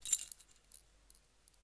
keys rattle2
Rattling bunch of keys being taken out. Recorded with Oktava-102 mic and Behringer UB1202 mixer.
foley, keys, metal, rattle